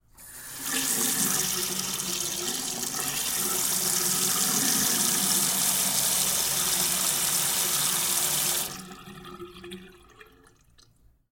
sink water bathroom1
Bathroom sink. Tiled walls and small. Faucet turns of, runs, turns off. You can hear the water draining.
Mic: Sennheiser MKH416
416, bathroom, drain, faucet, sink, water